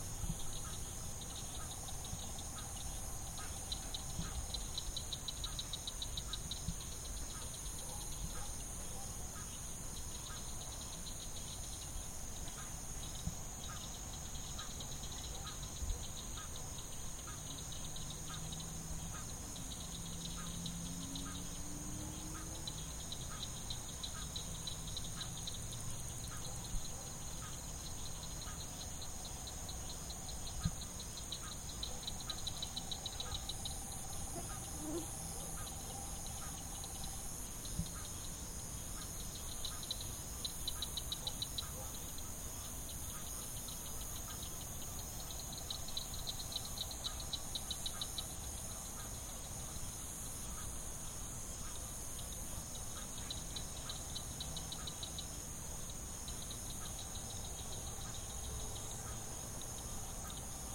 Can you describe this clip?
Out in the loud and creepy woods recorded with laptop and USB microphone.
animals, field-recording